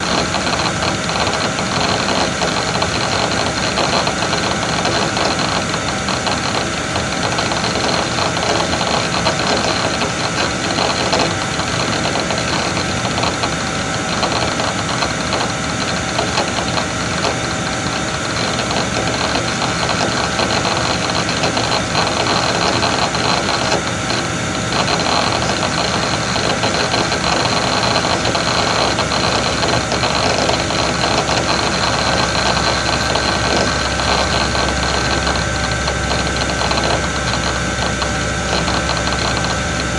Recording computer harddisk with noises from computer fan. Microphone: Behringer ECM8000 -> Preamp: RME OctaMic -> RME QS
computer, harddisk, mechanical